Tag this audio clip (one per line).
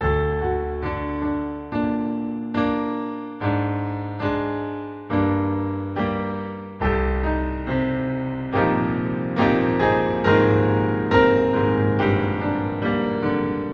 dub
loop
piano